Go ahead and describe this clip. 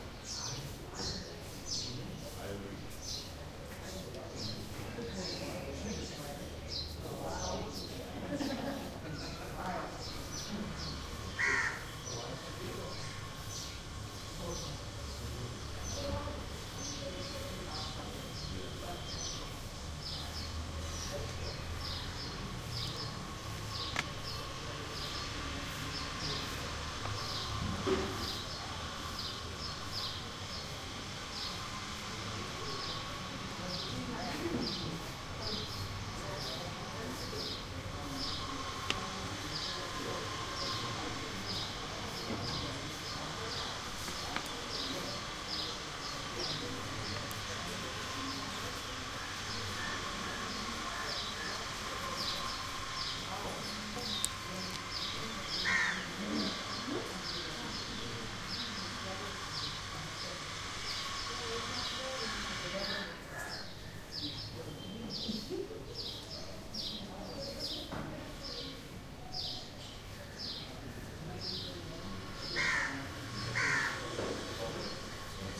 Greenwich Inn Patio
Beside the pool in lobby of Greenwich hotel in Khatmandu Nepal
khatmandu, nepal, ambient